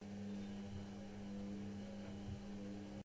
washing machine D (monaural) - Washing
washing-machine, field-recording, high-quality